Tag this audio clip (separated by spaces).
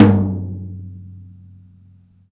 mid tom